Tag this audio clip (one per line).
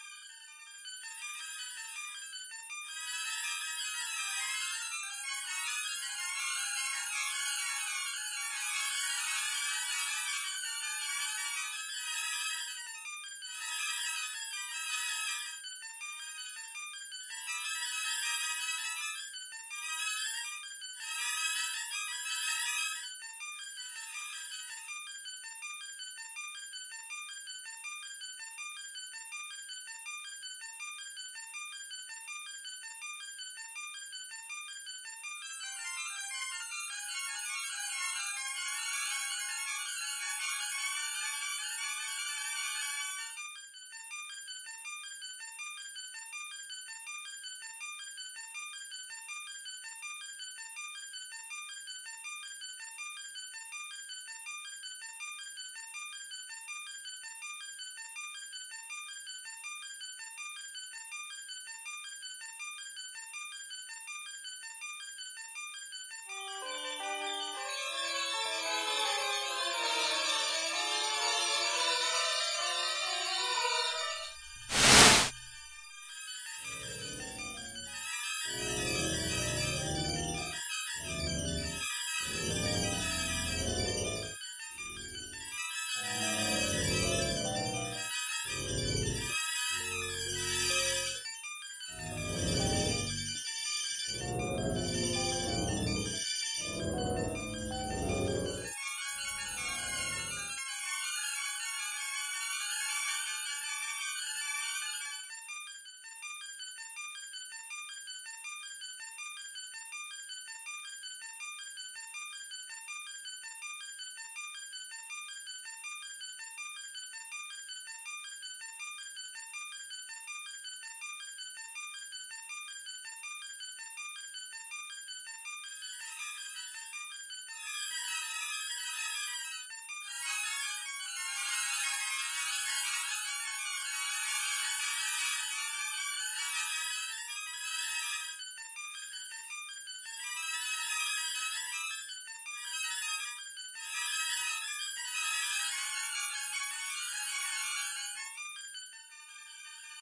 corsica-s
crazy
electric-piano
fwist
image-to-sound
loop
loopy
mad
melody
music
repetitive
rhodes
samples